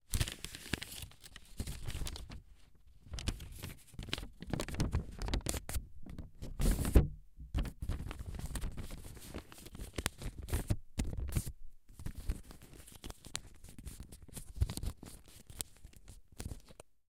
Folding a piece of paper a few times.